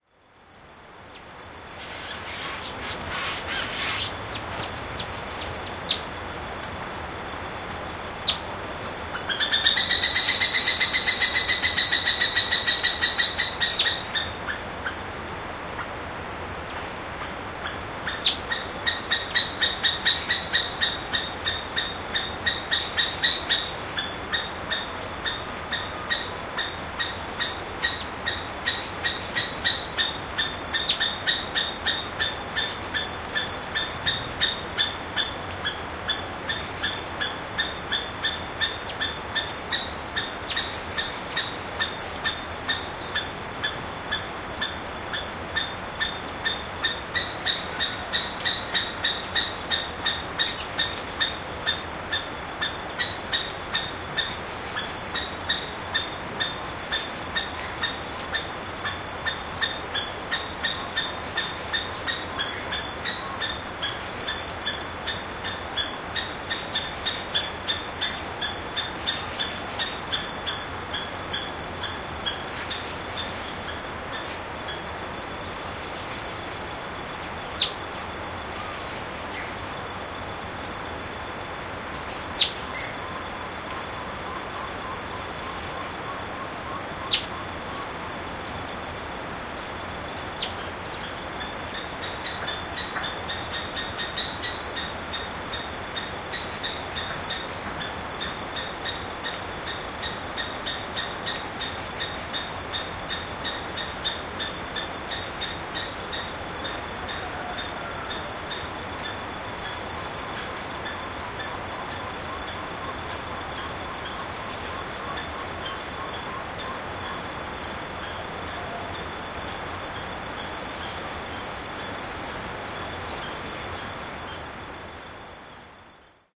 Seriema bird on early (sunny) morning in the interior of Minas Gerais, Brazil.